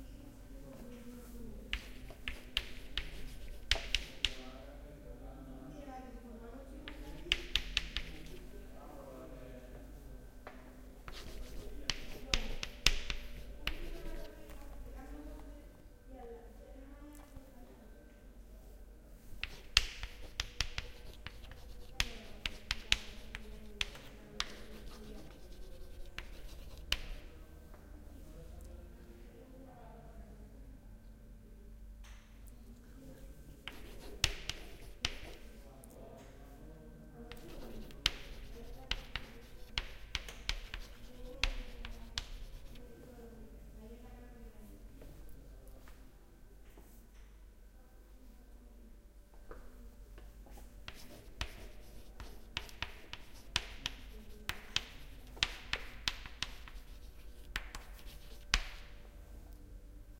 20070517.chalkboard.writing
sound of writing with a piece of chalk on a blackboard. Edirol R09 internal mics
writing, field-recording, chalk